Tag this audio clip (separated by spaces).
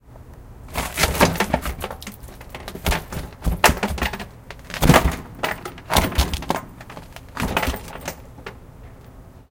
can trash bin thud noise